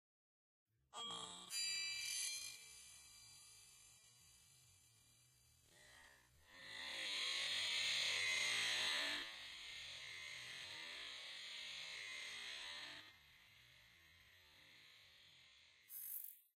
eerie-metalic-noise
An eerie metallic sound created by slowing down the sound of two glasses scratching and striking against each other.
glass, metallic